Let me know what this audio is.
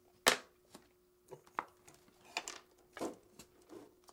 Working at a Desk Foley
Someone foley of a person working at a desk with some small tools.
desk, tools